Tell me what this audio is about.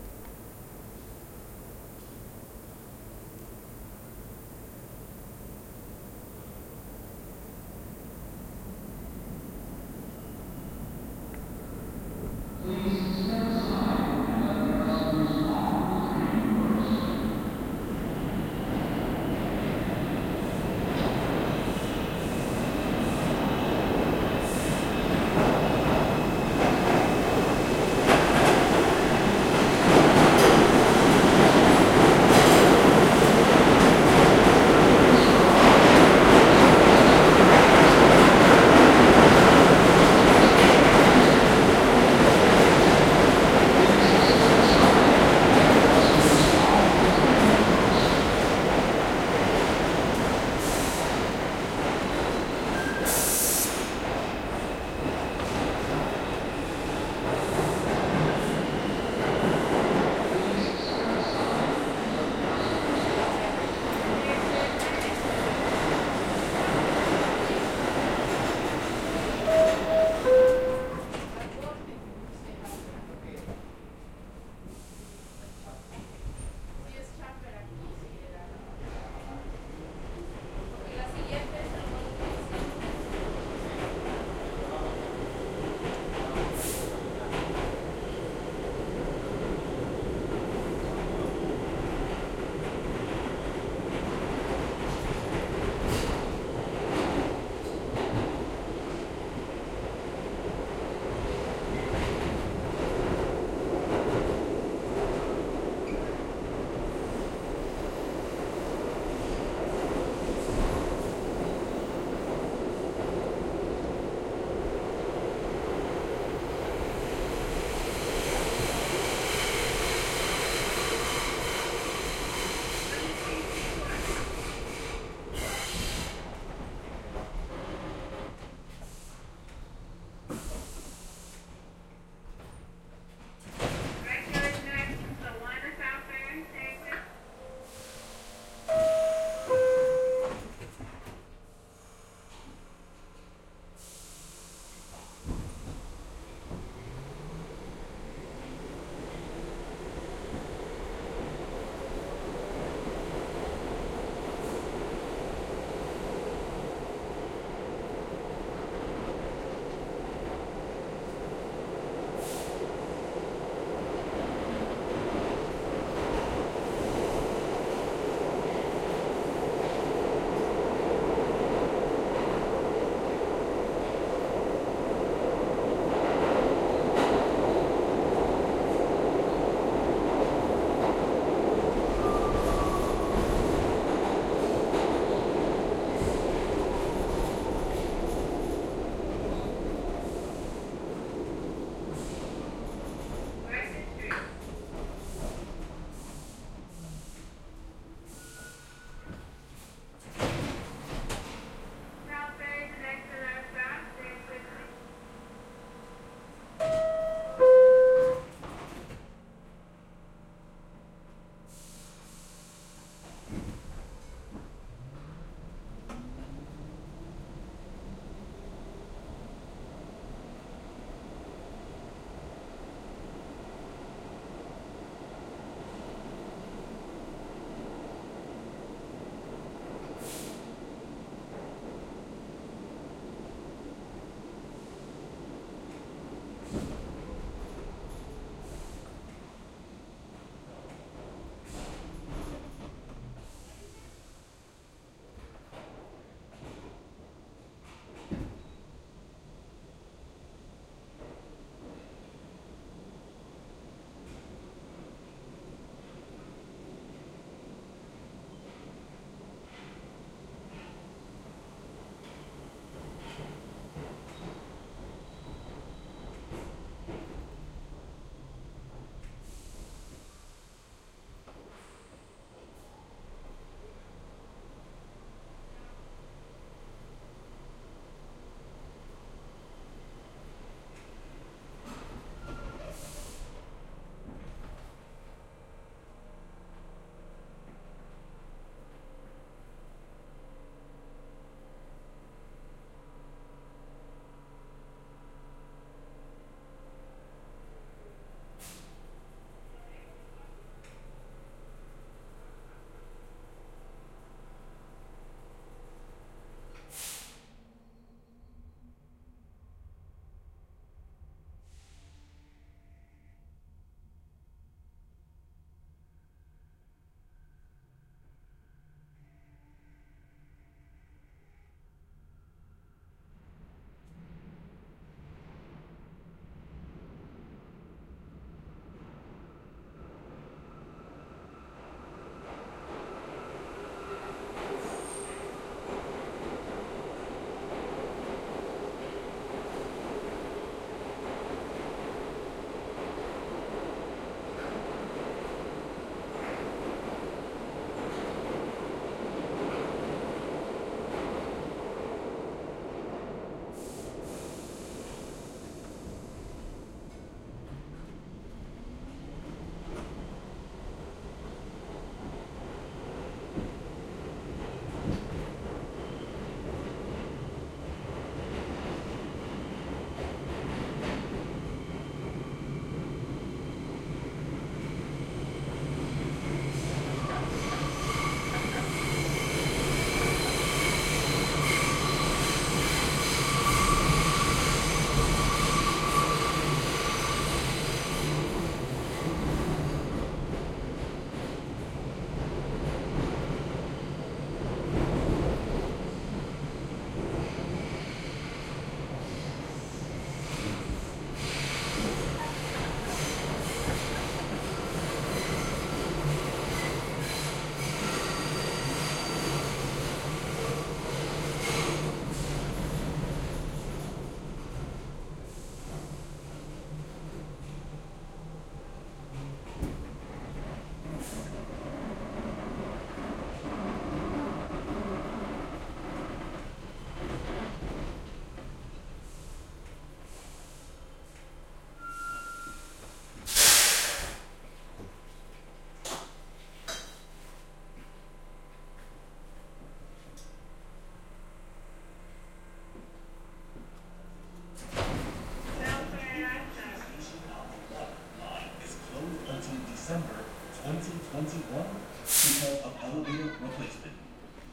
Subway Train Arriving to Station (NYC)
Sound of an NYC subway train arriving to station.
*If an MTA announcement is included in this recording, rights to use the announcement portion of this audio may need to be obtained from the MTA and clearance from the individual making the announcement.
departure, platform, underground, Spanish-announcement, train-station, ambiance, field-recording, subway-platform, New-York-City, subway-announcement, station, MTA, arrival, west-side, NYC, announcement, IRT, railway-station, train, metro, 1-train, transit, railway, departing, subway